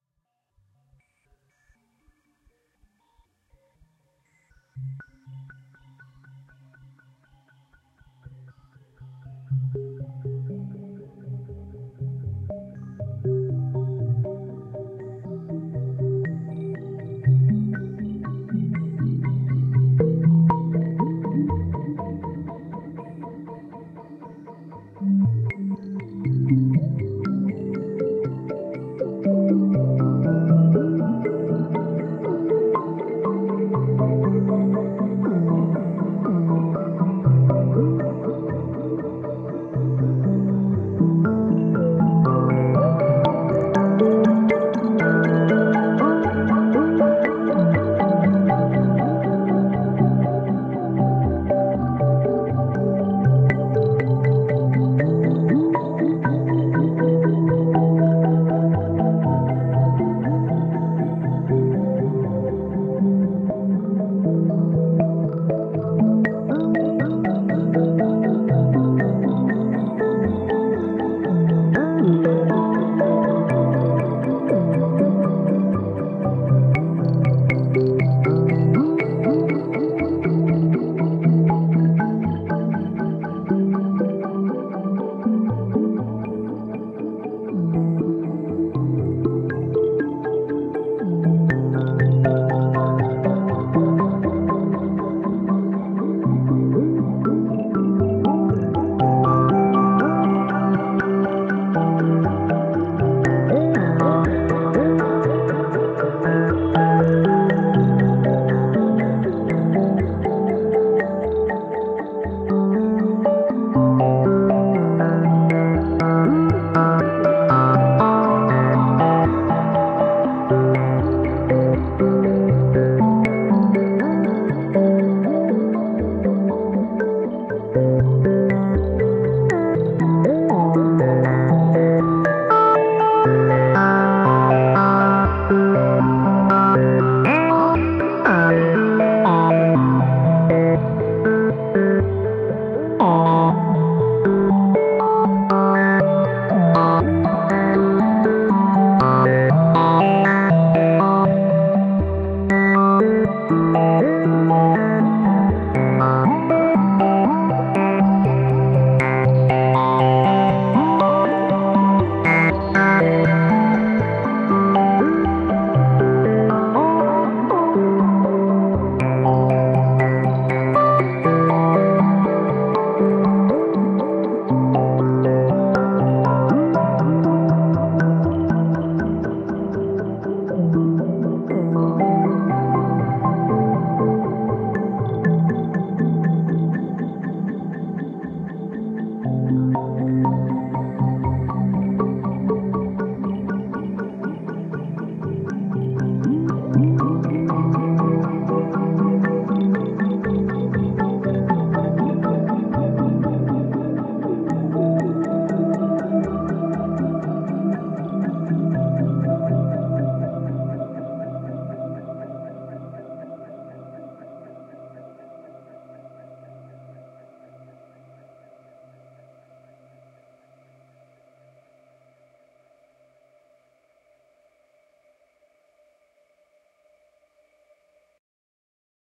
1022 solar modseq
semi-generative melodic analog sequence drenched in delay and reverb.
key of C pentatonic minor, 96bpm.
Moog Matriarch sequenced by Intellijel Metropolis, filtered by SSF Stereo Dipole and reverb by Noise Engineering Desmodus Versio.
minimally post-processed in Ableton with compression, eq, and transient control.
ambient, melodic, modular, pretty, synthesizer